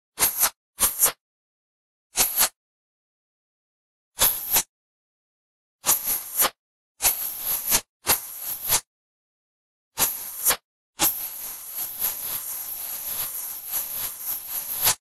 Accum Stutter
Multiple breath sounds. Heavily processed. Increasing length.
breath, highly-processed